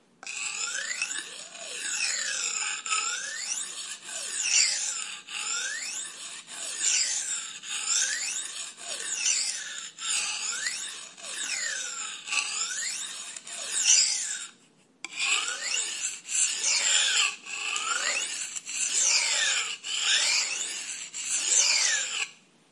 Sharpening a knife with grinding stone. Audiotechnica BP4025, Shure FP24 preamp, PCM-M10 recorder
sword, metal, knife, blade, sharpen, steel, iron, sharpening, grinding